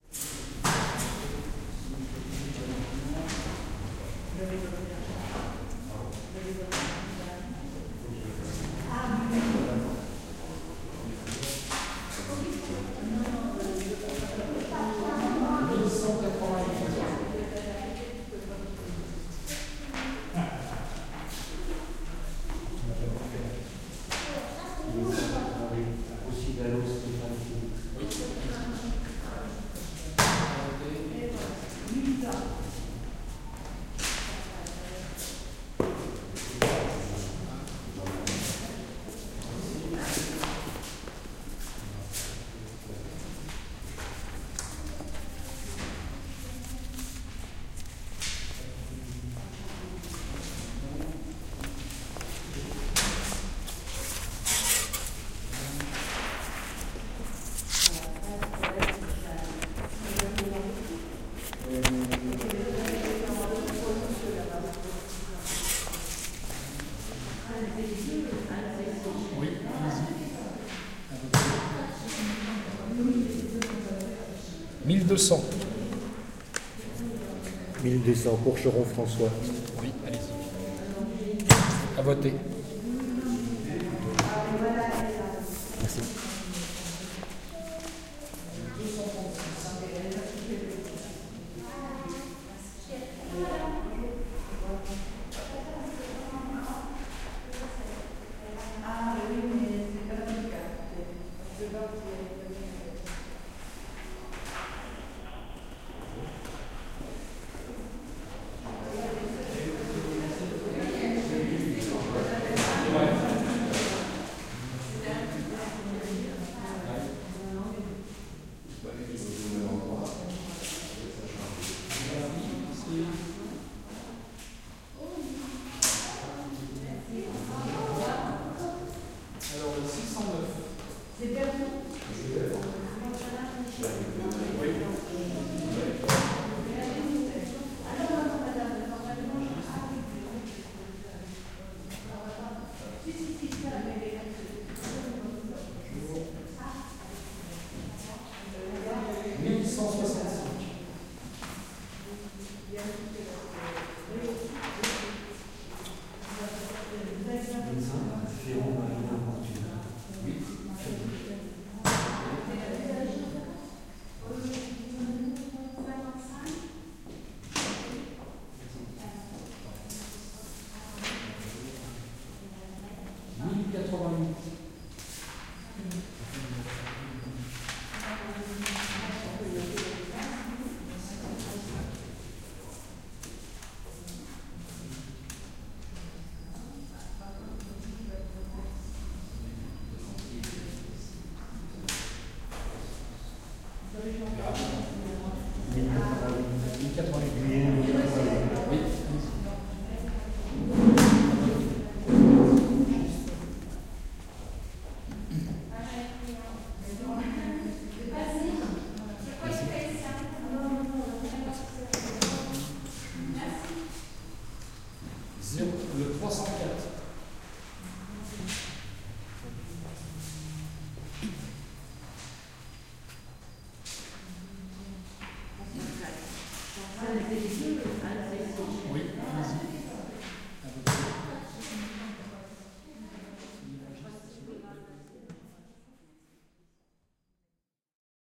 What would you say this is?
At the poll office for the french presidential elections